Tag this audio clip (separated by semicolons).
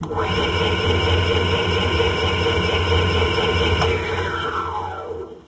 tools; sound-effects; machine; mechanical; bench-drill